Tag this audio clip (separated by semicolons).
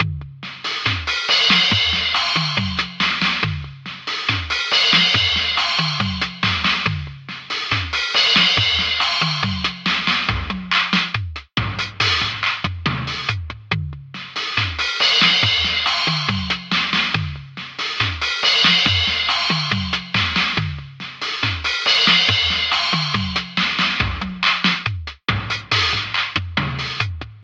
75bpm; drumloop; 4